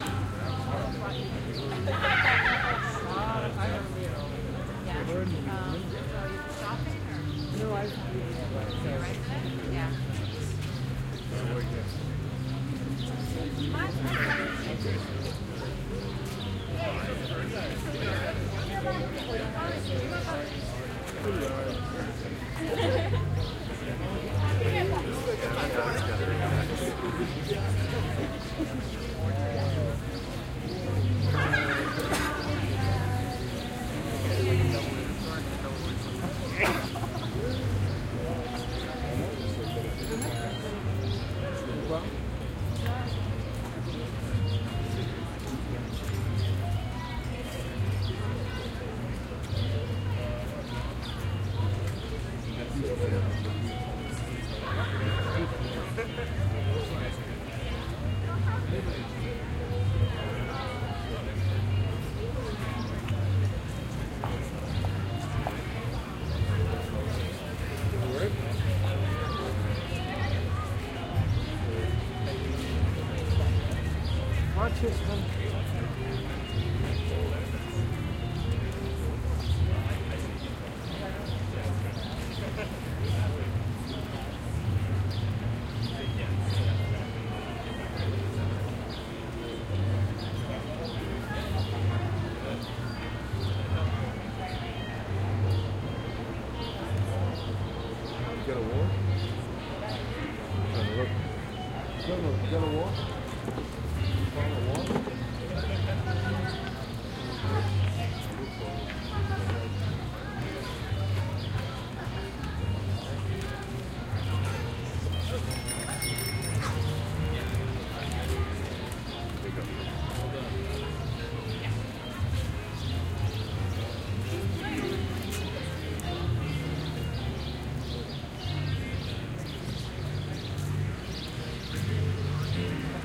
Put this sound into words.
kensington market 02
Walking around Toronto's Kensington Market area. Bits and pieces of street performers, music. For a while I stopped and watched a street-sized Scrabble game.Recorded with Sound Professional in-ear binaural mics into Zoom H4.
binaural, canada, city, crowd, field-recording, kensington, market, music, outside, performers, toronto